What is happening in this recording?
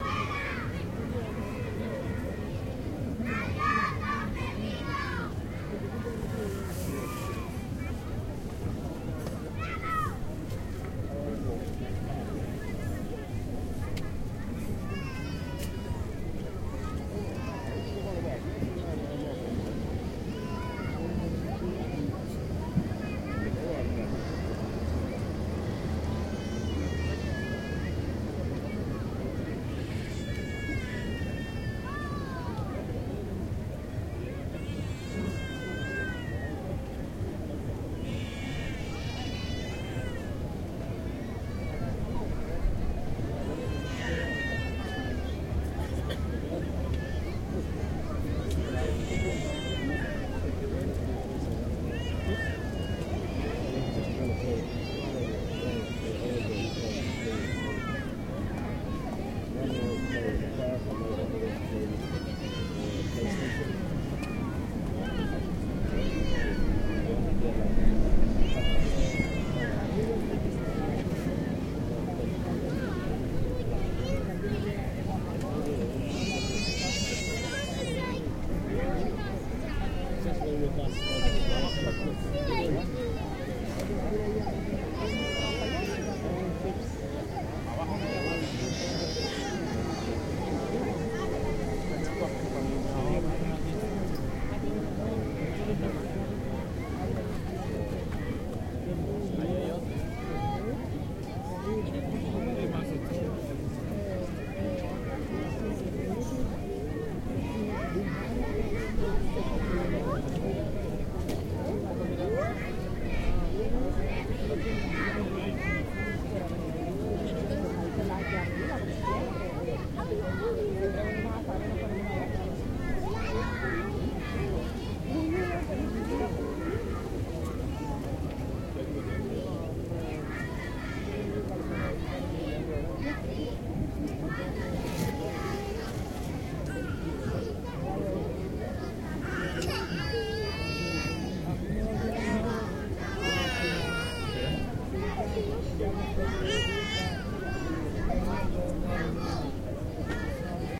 100731-GCSR-playaprc-1
Beach atmosphere
Noontime wide-angle atmo of the Playa de Puerto Rico on Gran Canaria, lots of bathers, surf and the occasional boat in the background.
Recorded with a Zoom H2 with the mics set at 90° dispersion.
This sample is part of the sample-set "GranCan" featuring atmos from the island of Gran Canaria.